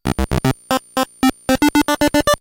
Warna Pattern 1
videogame, 8bit, glitch, drumloops, nanoloop, cheap, gameboy, chiptunes